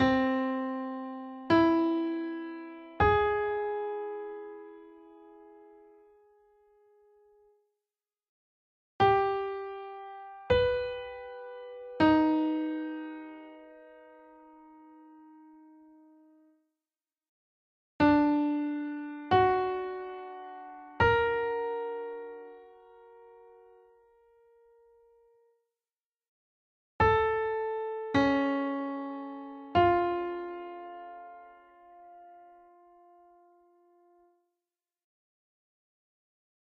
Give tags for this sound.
augmented
triads

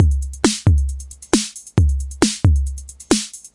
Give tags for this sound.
Analog
Beats
Circuit-Bend
Drum
Electronic
TR-606

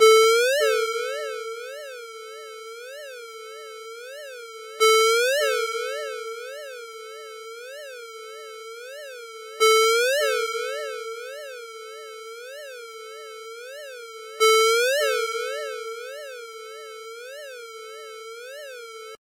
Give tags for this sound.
cell
alert
ringtone
alerts
peak
cellphone
alarm
cell-phone
mojomills
mojo
phone
ring
ring-tone

